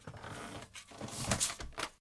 Creak and slide